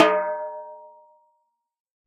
TT12x8-VHP-HdE-v03
A 1-shot sample taken of a 12-inch diameter, 8-inch deep tom-tom, recorded with an Equitek E100 close-mic and two
Peavey electret condenser microphones in an XY pair.
Notes for samples in this pack:
Tuning:
LP = Low Pitch
MP = Medium Pitch
HP = High Pitch
VHP = Very High Pitch
Playing style:
Hd = Head Strike
HdC = Head-Center Strike
HdE = Head-Edge Strike
RS = Rimshot (Simultaneous Head and Rim) Strike
Rm = Rim Strike
velocity, drum, 1-shot, multisample, tom